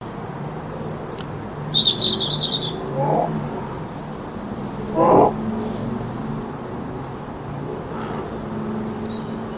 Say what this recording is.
sounds of animals on a garden at the country